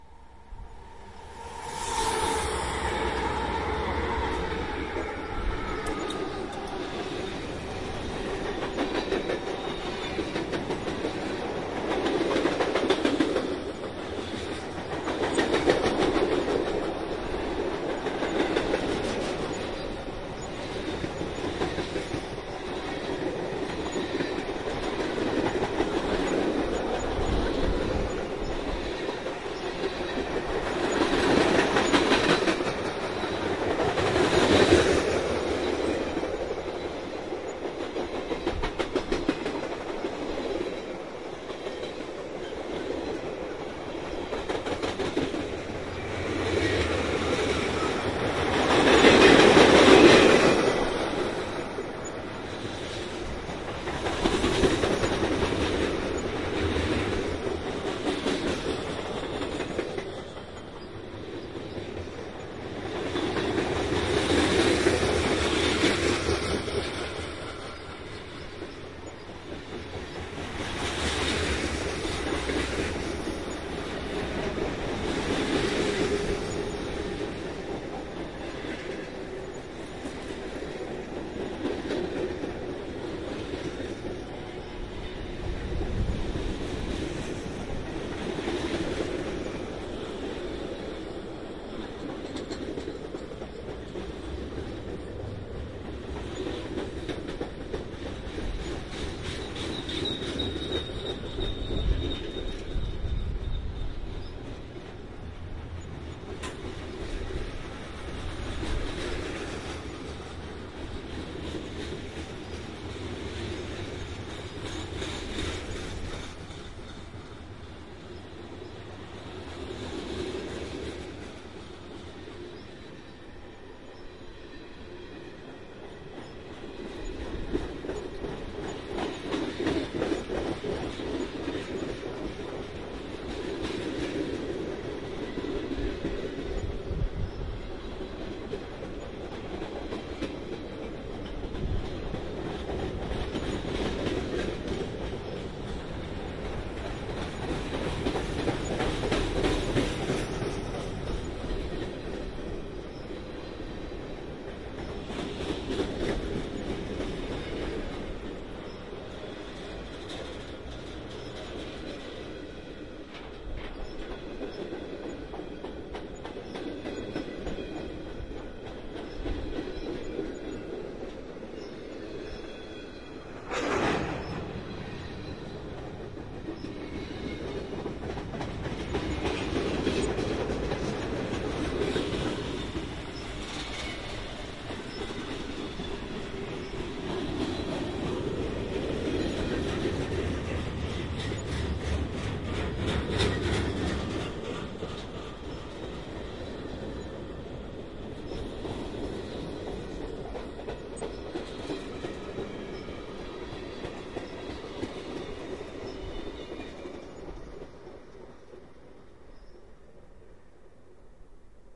northbound freight
Recorded at the Milwaukee, WI Amtrak Station on April 28,2006 while waiting for my friend to arrive from Detroit, MI. For this recording I used a Sony DAT recorder and a Sony hand-held stereo mic.
airport, amatrak, ambent, field-recording, freight, milwaukee, railroad, train